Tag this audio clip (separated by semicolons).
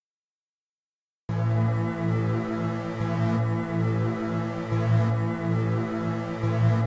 dubstep; sound-fx; 140-bpm